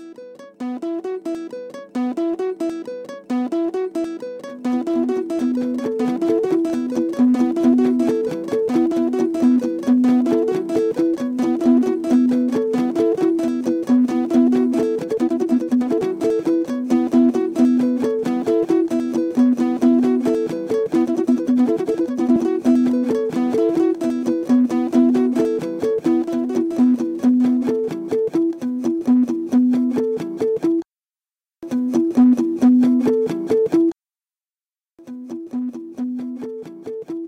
two short guitar riffs (nylon strings) assembled together at random, then tweaked in several ways (repeated, changed tempo, pan, volume) to give the appearance of something that was made carefully.